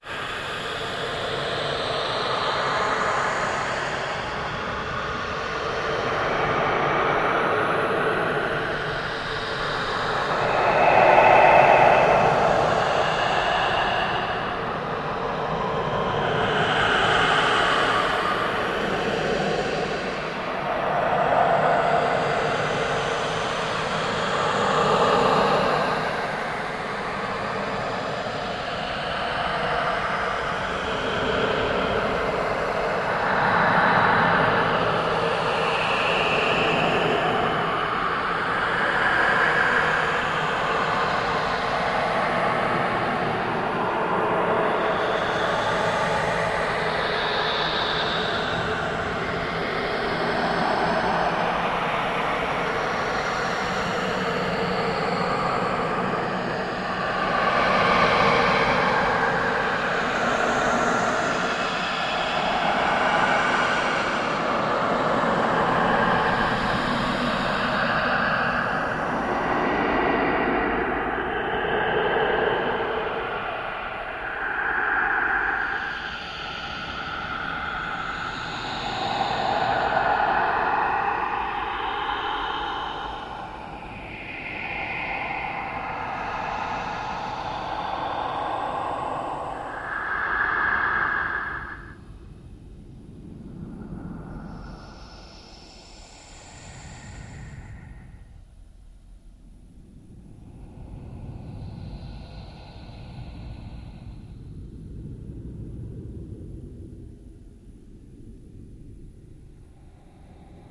mosters of the abyss (PS)
Isn't Paulstrech a wonderfull program? Demons seem to talk angrily backwards in this sound stretched with Paulstretch.
demon, devil, evil, guttural, horror, paulstretch, processed, scary, streched-sounds, supernatural, synthetic, synthetic-vocal